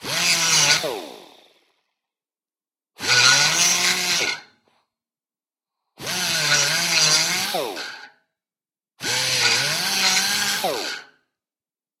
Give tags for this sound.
pneumatic-tools labor tools 4bar air-pressure crafts motor 80bpm work grind pneumatic metalwork straight-die-grinder

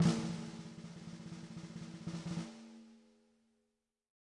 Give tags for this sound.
drum percussion

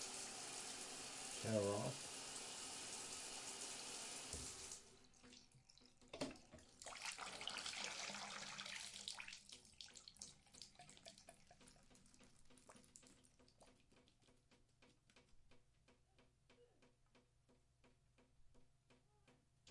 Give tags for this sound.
bathroom bathtub faucet running shower water

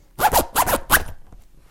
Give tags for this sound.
noise zipper scratch natural egoless 0 vol sounds

